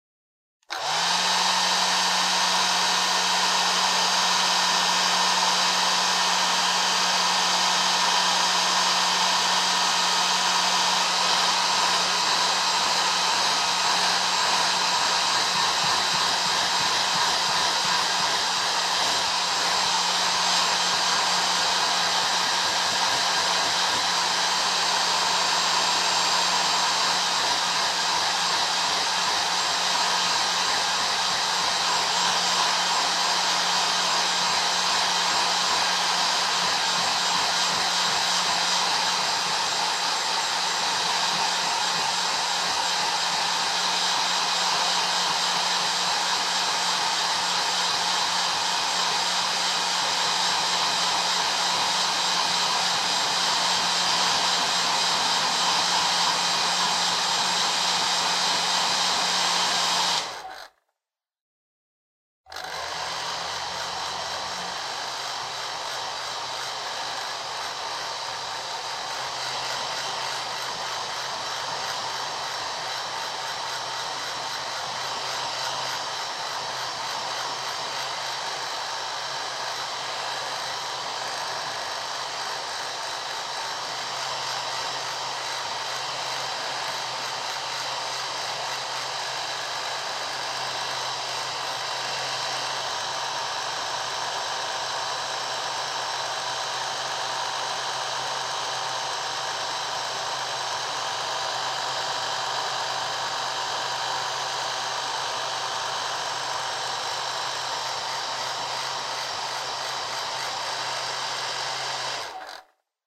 With two different speed settings.